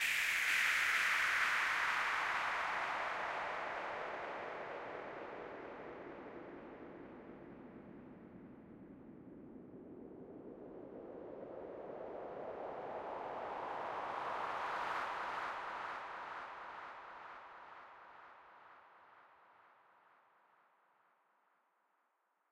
FX Noise house falling 2 128
Falling effect frequently used in electro house genre.
laser, electro, effect, fx, synth, falling, house, shots, dance